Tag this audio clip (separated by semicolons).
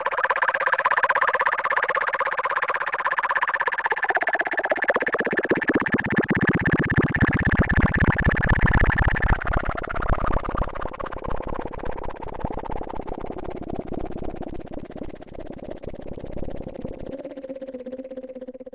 analog; electronic; synth; noise